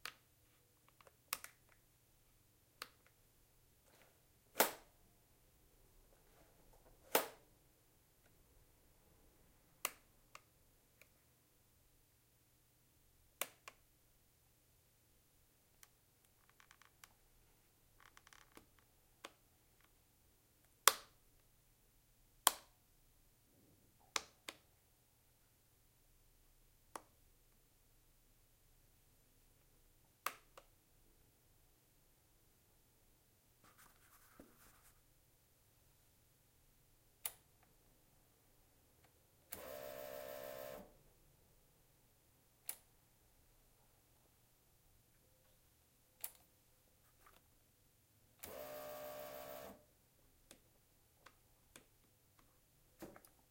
NZP BMW 1150GS switches
Assorted handlebar switch foley on a 2002 1150 GS air cooled BMW. Can't have too many "plasticky" switch sounds around!
bmw, click, foley, handlebar, motorcycle, switch